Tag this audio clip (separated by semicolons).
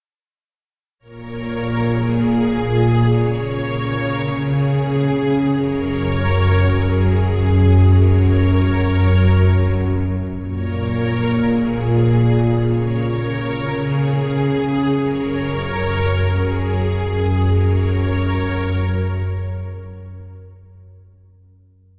ambience; ambient; atmosphere; background; background-sound; cinematic; dark; deep; drama; dramatic; drone; film; hollywood; horror; mood; movie; music; pad; scary; sci-fi; soundscape; space; spooky; suspense; thiller; thrill; trailer